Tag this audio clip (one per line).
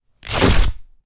breaking
bursting
cloth
drapery
fabric
ripping
rupturing
tearing